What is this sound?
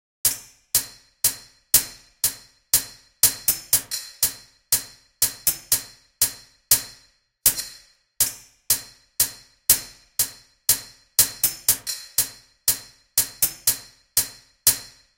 Hi-Hat Metallic Rhytm Techno
Hi-hat metallic rhythm techno...
glitch, glitching, glitchy, groove, hi-hat, house, iron, loop, metal, metallic, percussion, percussive, reverse, reversed, rhythm, techno